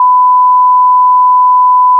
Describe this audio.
Censor Bleep
Just in case there's some foul-mouthing.